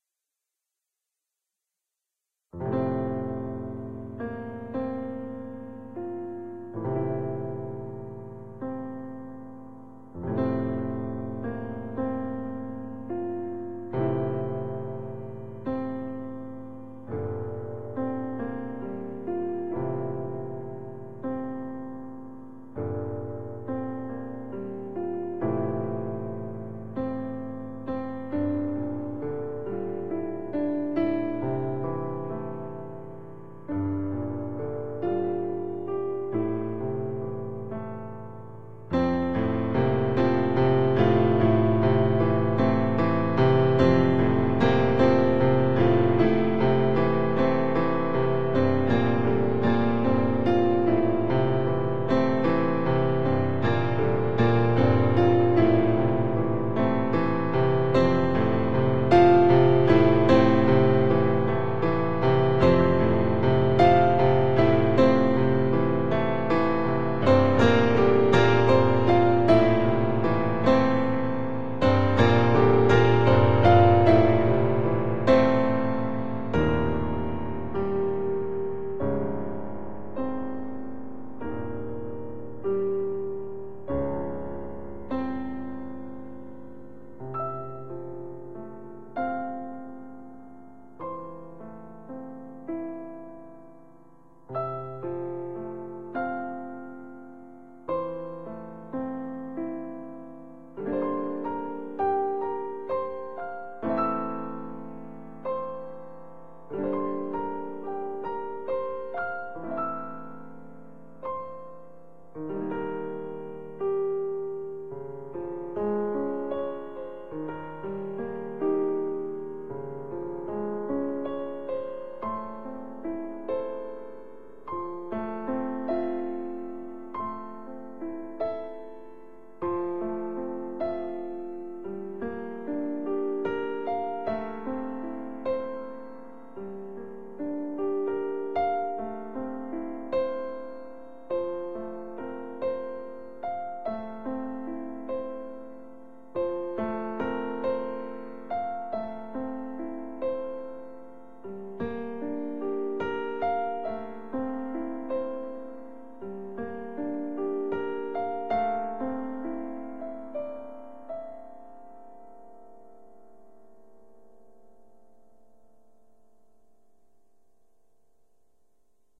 Chasing Clouds
It's been a while since I uploaded a track here. I decided to share a new piano piece with you that I have quickly composed in summer. I am curious to know how can my work be implemented in yours and what purpose it will serve!
Anime, Bamboo, Composition, Dainius, Danny, Hope, Instrument, Instrumental, Leading, Melancholy, Mood, Narrative, Ost, Piano, Positive, Soundtrack, Touching, Vitkevicius